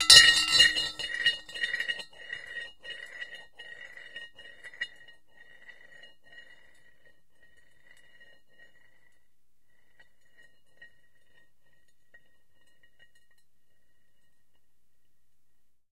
marbles - rolling around 33cm ceramic bowl - 1 large marble 04
Rolling a large marble around a 33cm diameter ceramic bowl.
bowl, ceramic, ceramic-bowl, glass, marble, marbles, roll, rolled, rolling